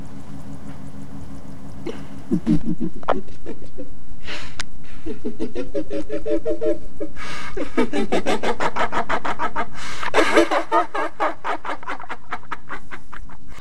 this is audio of my sis laughing and inserted copied channels to make her sound more demonic (no background sound)